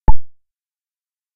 Click Generic Neutral Static Sound
UI sound effect. On an ongoing basis more will be added here
And I'll batch upload here every so often.
Static, Third-Octave, UI, SFX, Sound, Neutral, Generic, Click